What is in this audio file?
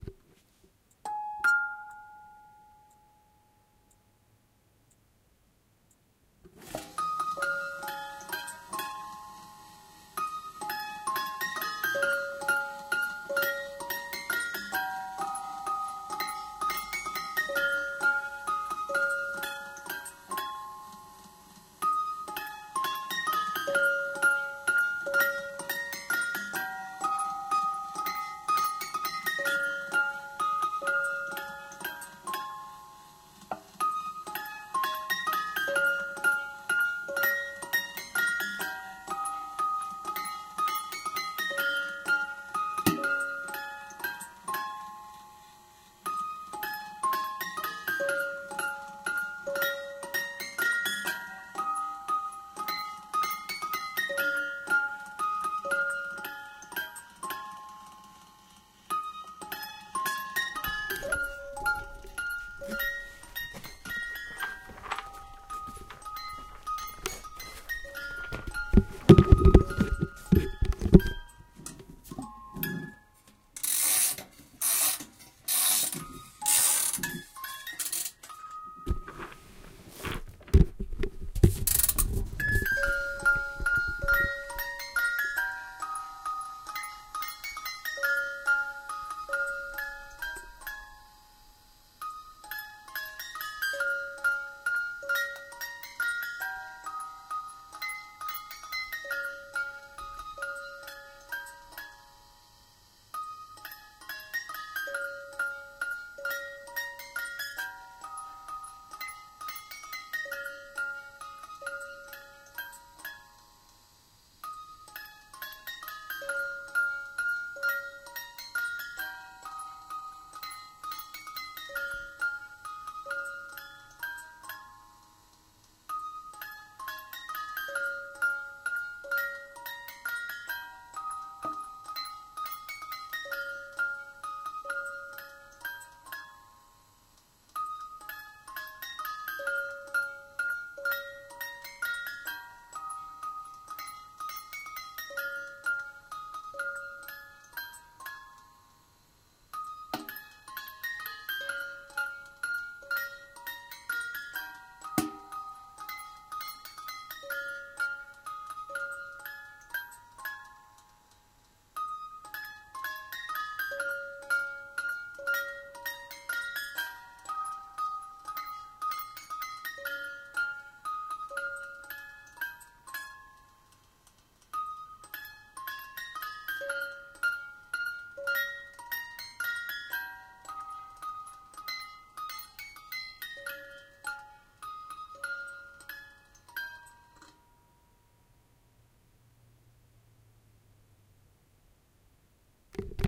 Found a half-broken wind-up rotating christmas tree music box... thing...
Left channel is mid, right is side.
To do this manually you should separate the channels to two mono tracks, duplicate the side track and invert the duplicate. Pan the side tracks hard left and right, and mix with the mono mid channel.
Or use a plugin to do it for you :D
lofi, creepy, children, musicbox, music-box, lo-fi, christmas